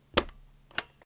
Chunky Plastic Button being Pressed
Chunky plastic toy button being pressed and released.
Button, Toy